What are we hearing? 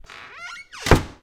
squeaky wooden door solid close
Solid quick closing of creaky wooden door. Recorded in studio (clean recording)
close, squeaky, door, wooden, slam, creaky